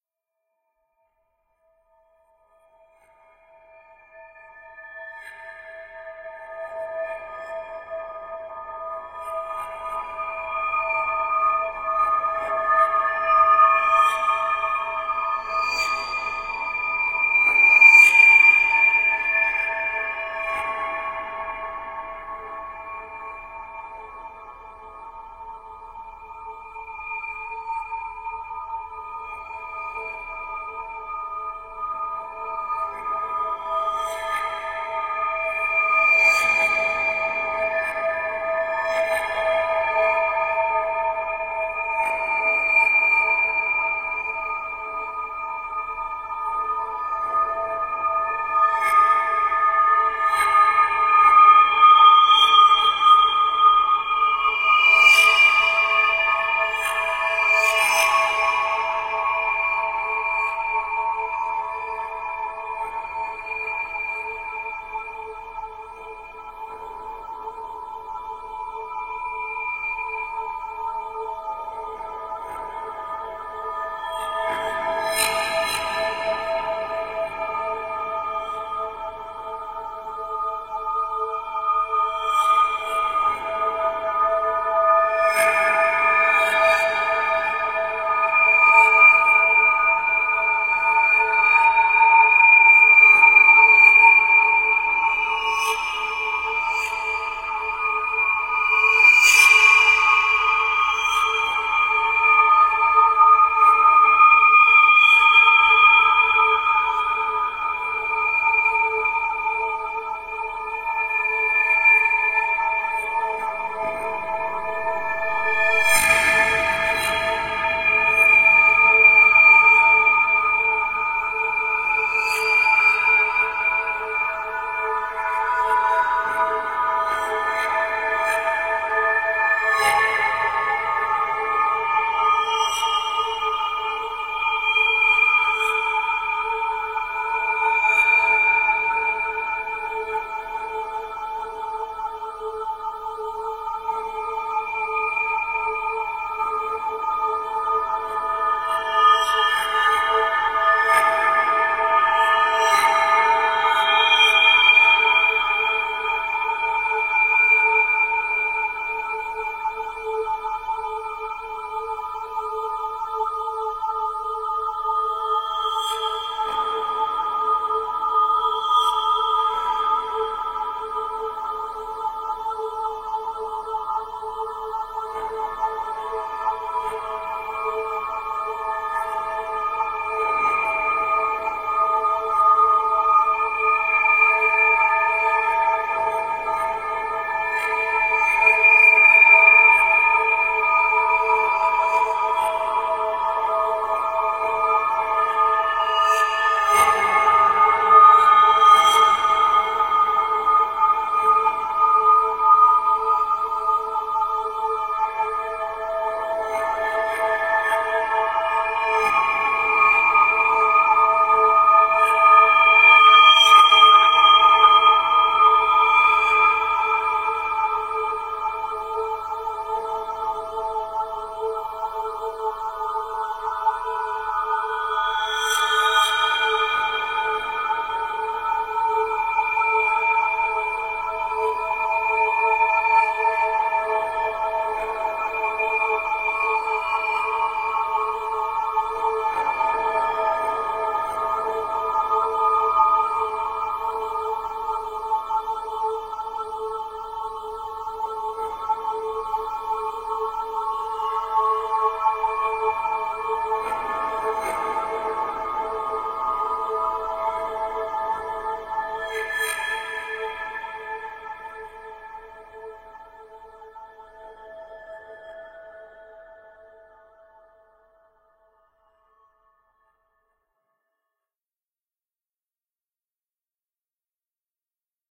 A heavenly (kinda) drone sound, using offthesky's windchime sound stretched out with its pitch lowered, layered with a light chorus in the background.
ambient, chant, drone, heavenly, holy